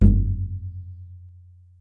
Nagra ARES BB+ & 2 Schoeps CMC 5U 2011.
bass drum hit on the hand
bass, hand, drum